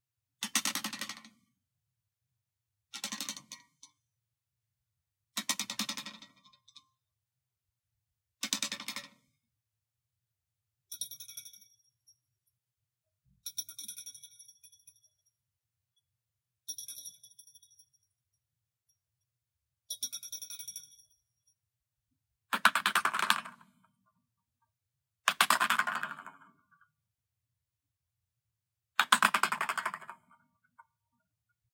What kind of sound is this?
Various twangs
Various landings of a metallic spring on wood, ceramic and cardboard.
impact, bounce